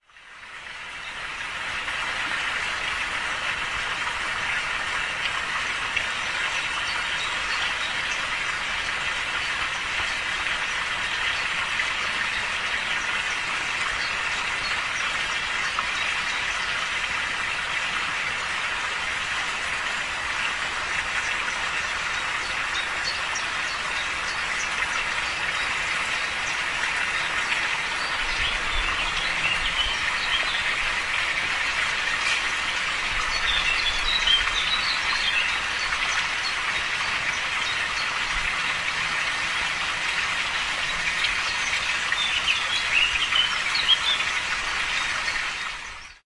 fish pond 290410
29.04.10: about 15.00. at the back of the stonemason's workshop on Koscielna street in Czerwonak (small town near of the Poznan city). the sound of the water falling to the fish pond. in the background birds sing.